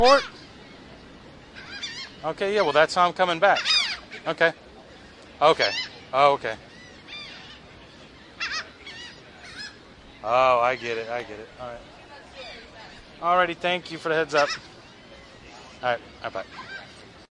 newjersey AC boardwalk SCAVEcallgull
Atlantic City Boardwalk pavilion by South Carolina Avenue recorded with DS-40 and edited in Wavosaur.
atlantic-city, call, field-recording, phone, seagull